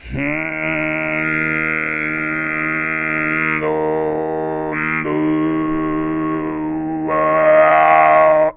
alfonso low 04
From a recording batch done in the MTG studios: Alfonso Perez visited tuva a time ago and learnt both the low and high "tuva' style singing. Here he demonstrates the low + overtone singing referred to as kargyraa.
singing, tuva, kargyraa, throat, overtones